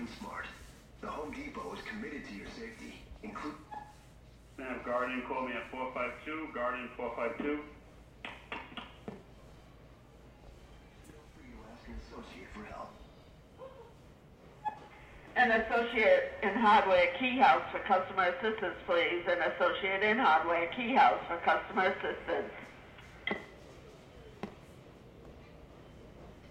PA Advert and Announcement home depot
An advertisement being interrupted by announcements in Home Depot
female, field-recording, home-depot, male, public-address, voice